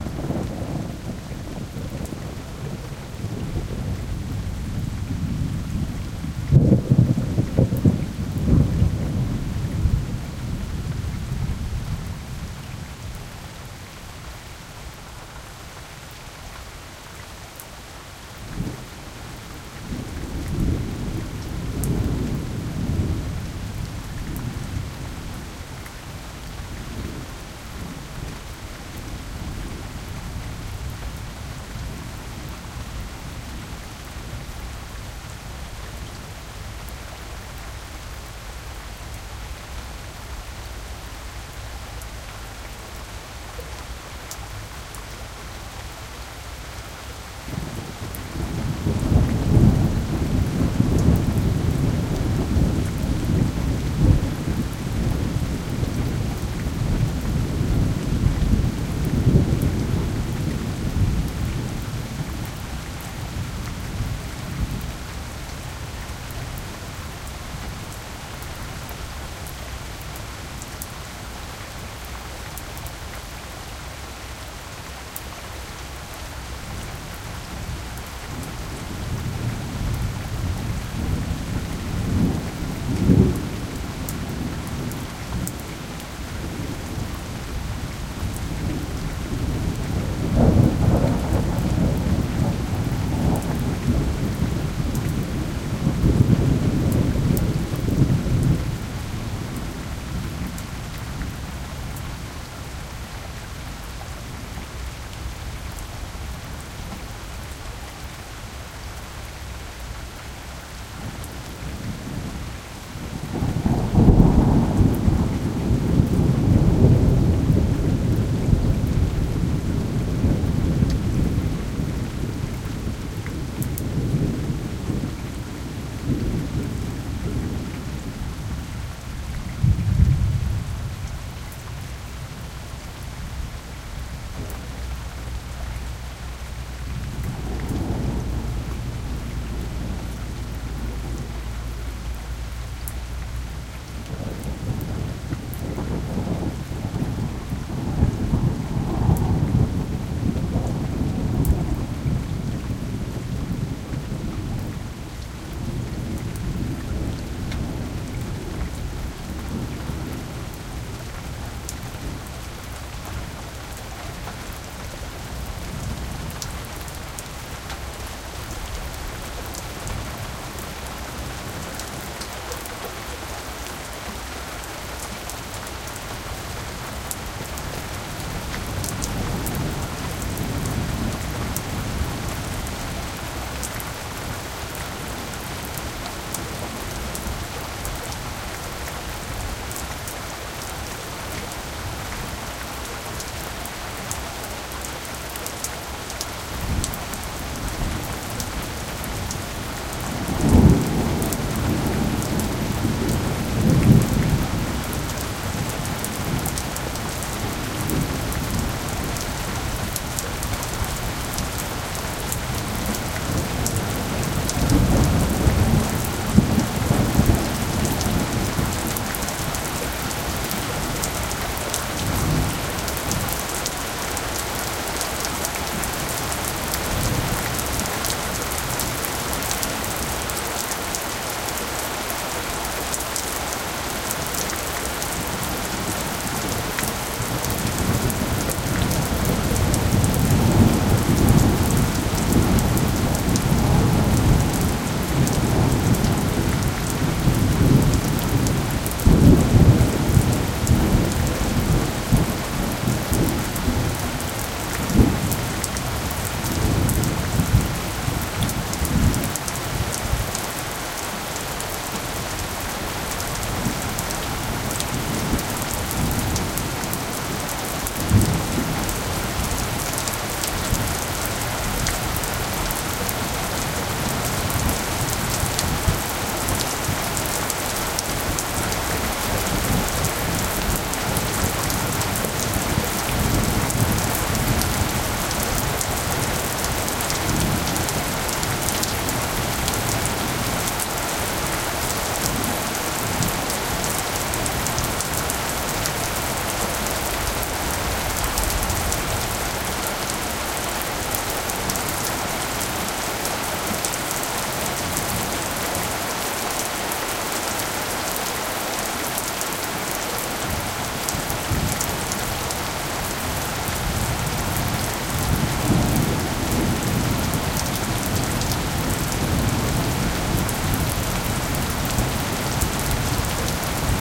Thunderstorm, rain and constant rumble. The sound of rain hitting the pavement. A sudden dramatic increase in rain. Recorded at Kalundborg in Denmark, August 2008, with Zoom H2 built-in microphones set to rear angle.